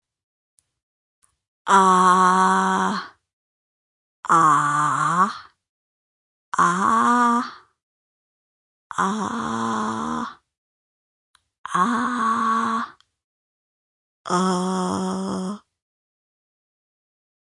Say Aaaaaah
Quiet 'Aaaaah' sounds, like you would make with a doctor or dentist looking down your throat. Recorder is female.
doctor, vocal, voice, nonverbal, female, speech, vocal-request, dentist, woman, girl